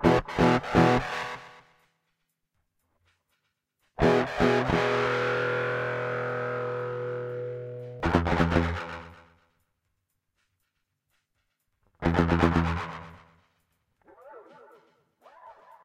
paul tdeep echo tube loop 1
chilled out echo guitar